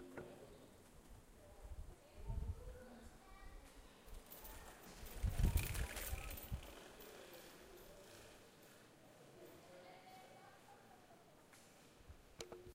Berlin bicycle passing - light rattling sound, indistinct family conversation, street ambience. Zoom H4n. Stereo.
field-recording, city, street